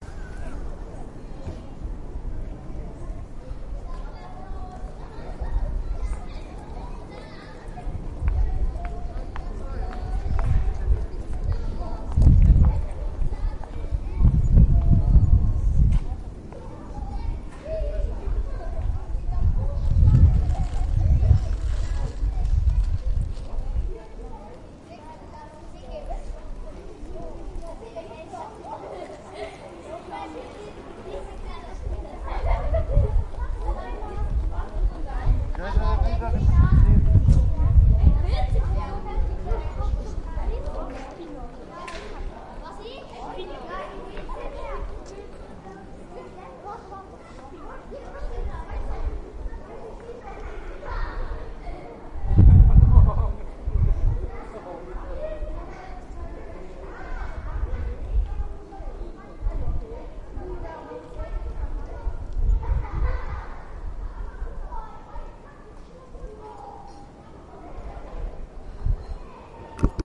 promenading at a shopping street in switzerland